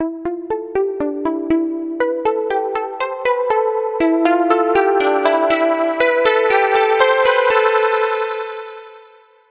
Short little tune made in Ableton